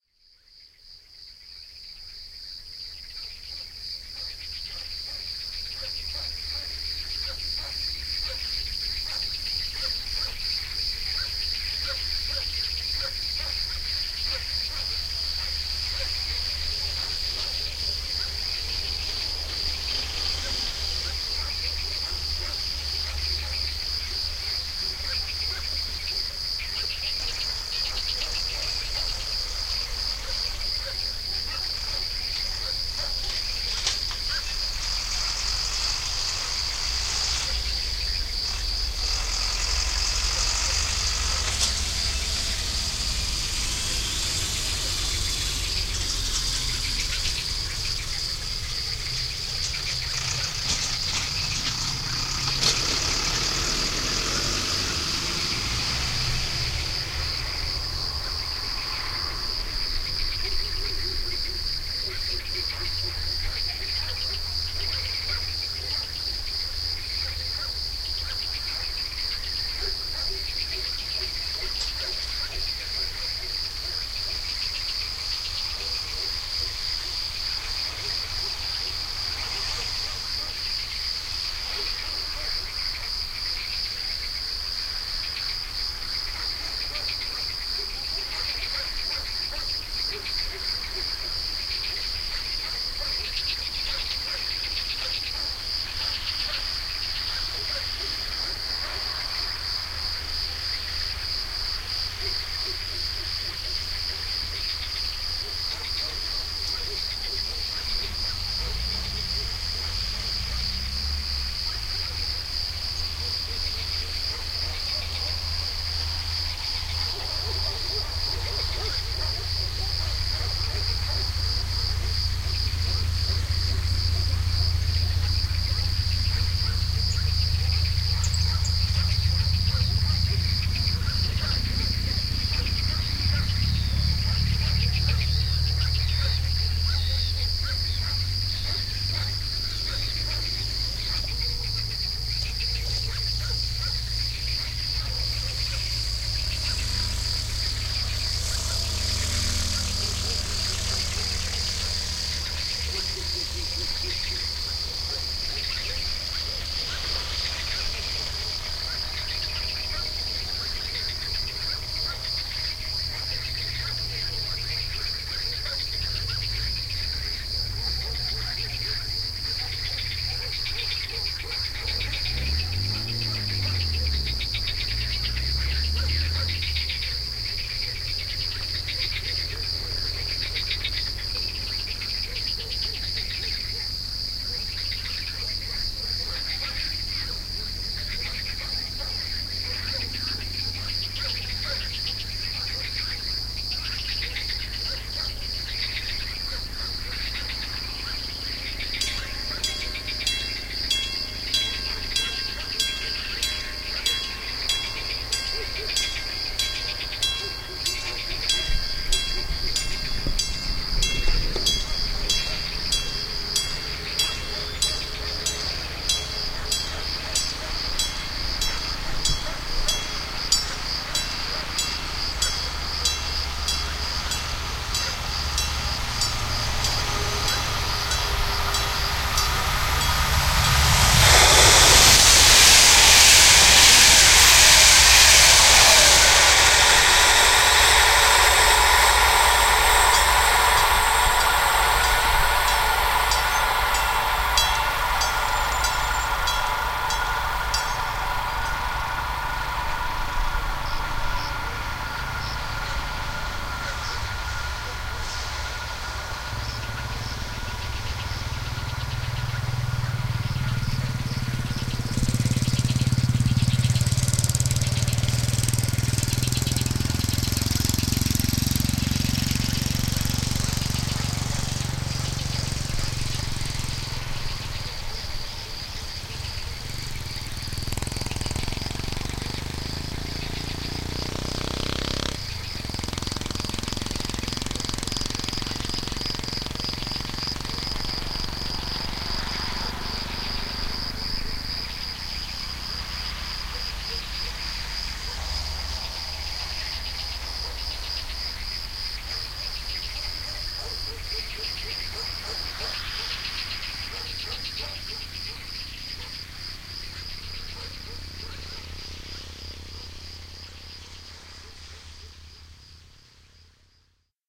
Nighttime Activities - (Pentalofos-Salonika) 21:44 30.04.12
Nighttime activities from crickets, frogs, barking dogs, birds, vehicles, trafic noise, passing trains all in one!
I made this recording with Zoom Handy Recorder at Galikos river near the old road to Kilkis in Pentalofos, Salonika. I used Adobe Audition CS5.5 for sound data enhance.
Barking-Dogs, Crickets, Dogs, Environment, Frogs, Greece, Passing-Trains, Trains, Vehicles